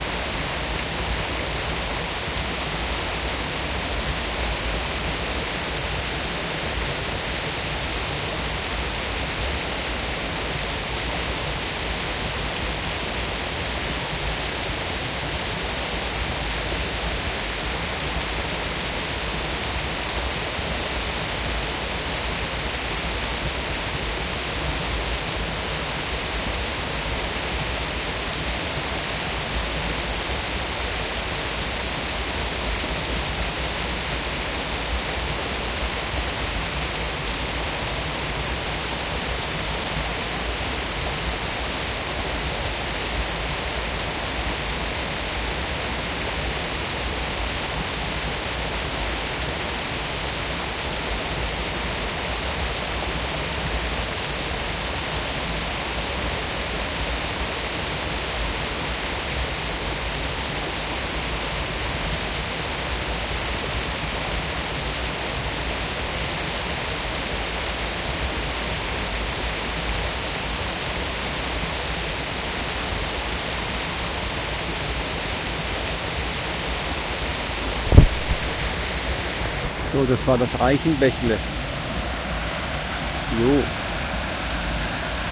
Murmuring, babbling, burbling and brawling brooks in the Black Forest, Germany.OKM binaurals with preamp into Marantz PMD 671.
brook, creek, flowing, forest, water